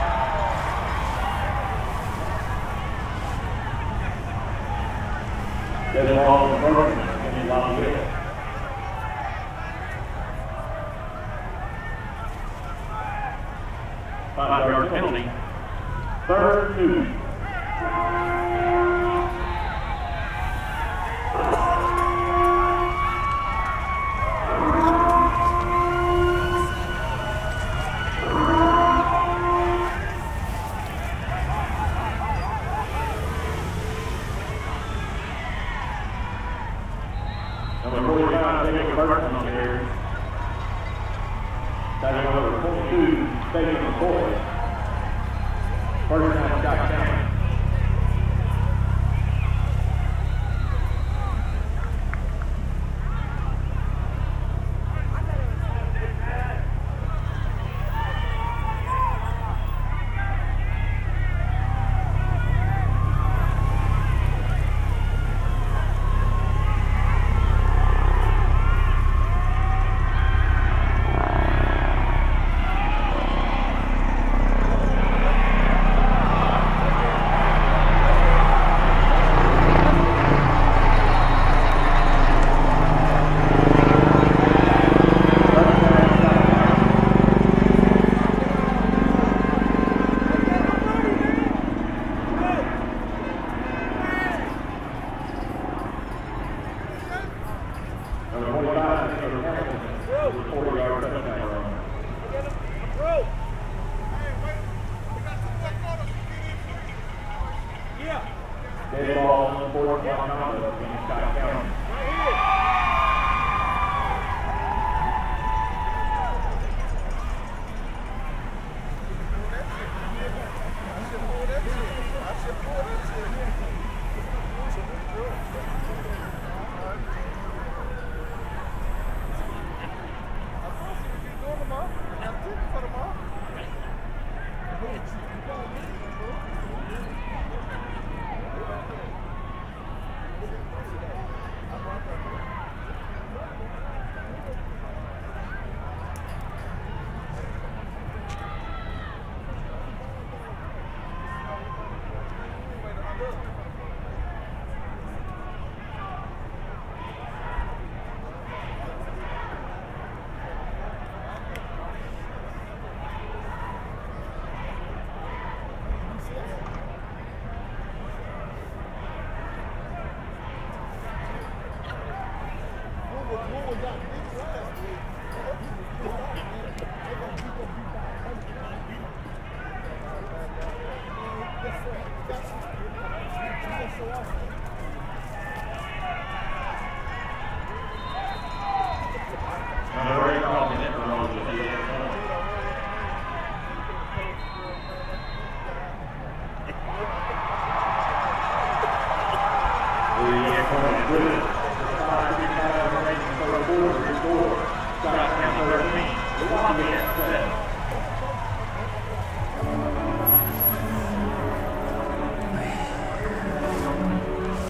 161125-002americanfootball-mono-mxl4000
American football in Lexington, Kentucky.
school, crowd, football, high